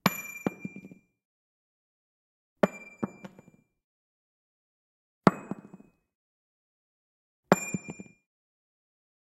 Heavy Key Drop On Carpet multiple
Heavy metal key dropped onto floor